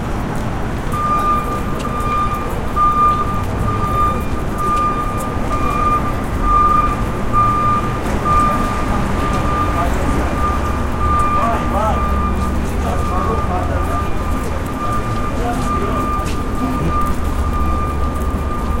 City Sidewalk Noise & Reversing Truck Beeps
beeps, city, field-recording, new-york, nyc, people, public, reversing, sidewalk, truck